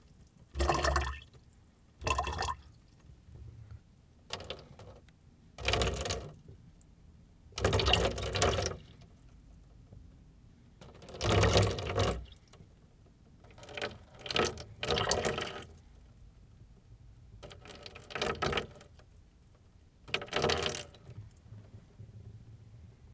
By request.
Water poured into a plastic half-gallon (about 2L) container.
AKG condenser microphone M-Audio Delta AP